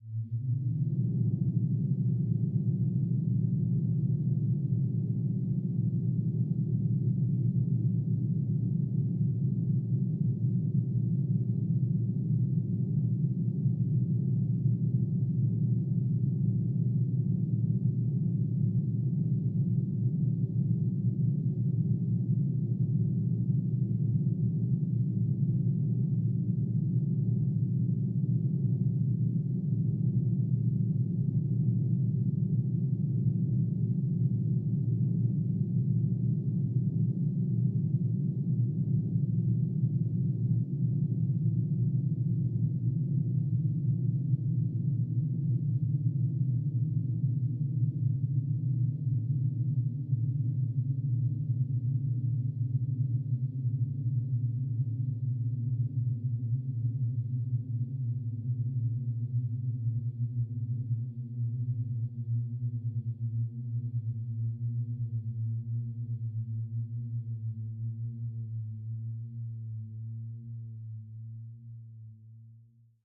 AMB Space Ship Engine INT
Space ship engine, Interior